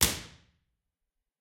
Studio B Far
Impulse response of Studio B at Middle Tennessee State University. There are 4 impulses of this room in this pack, with various microphone positions for alternate directional cues.
Impulse, Reverb, Response, Room, Ambience, IR